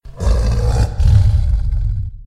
Monster - deep growl
Created from a tiger roar with audacity.
Growl, Large, Monster